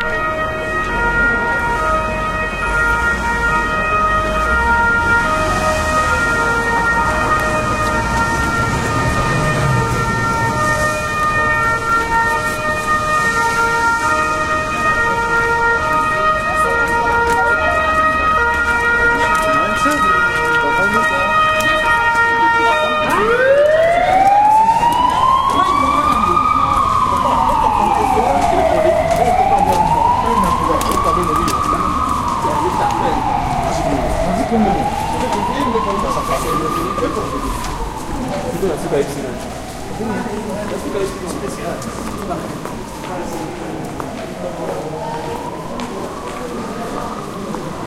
20100403.Brussels.ambulance
siren of an ambulance, people talk in background. Olympus LS10 internal mics
ambulance, brussels, field-recording, police, siren, traffic, urgency